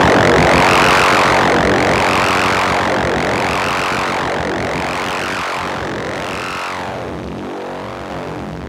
This is a lead synth sound I made for the XS24 on the Nord Modular G2 and Universal Audio UAD emulations of the Neve EQs, LN1176 Limiter, 88RS, Fairchild, and Pultec EQs. Also used the Joe Meek EQ from protools.
darkpsy, fm, g2, goa, lead, modular, nord, psytrance, synth